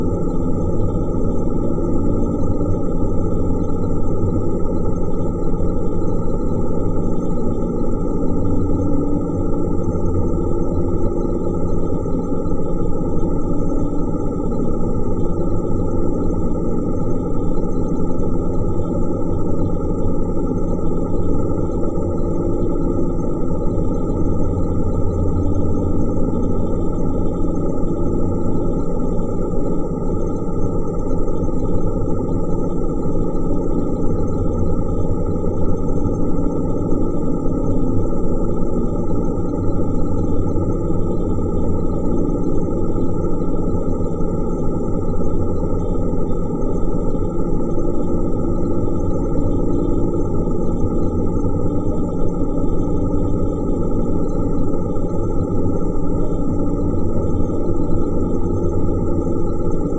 archi soundscape computer1
Created using a heavy subtractive spectral processing effect (ReaFir) that completely screwed up the source signal into this warbley computer-sounding thing.